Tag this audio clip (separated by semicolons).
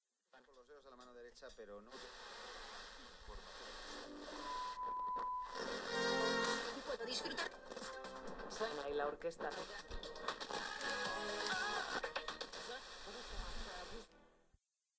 campus-upf; radio; tune-the-radio